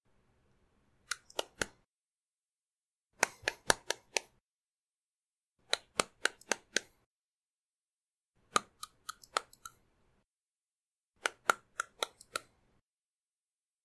Teeth Snapping
Me chomping down in front of my mic. I needed a sound for mouths forming out of a wall and biting at people but missing.
chew, chomp, crunch, snapping